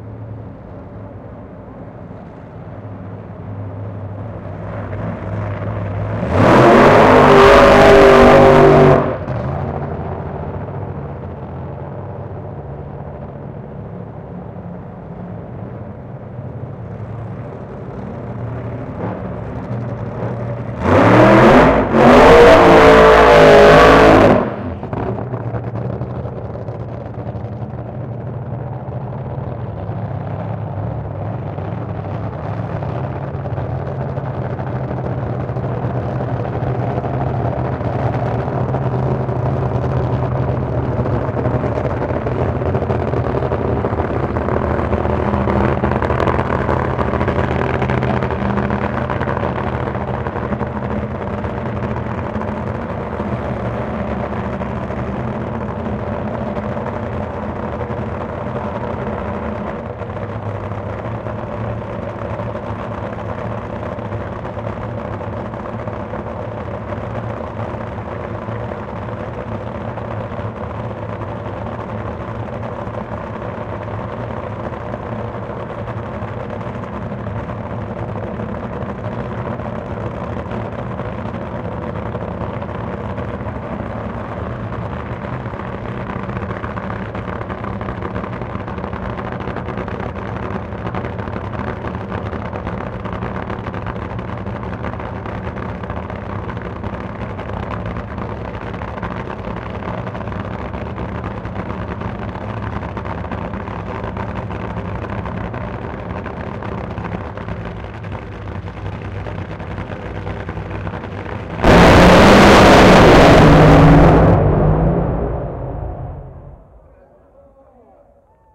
Top Fuel Complete Run Inc Burn Outs 2 - Santa Pod (B)
Recorded using a Sony PCM-D50 at Santa Pod raceway in the UK.
Drag-Racing, Dragster, Engine, Motor-Racing, Race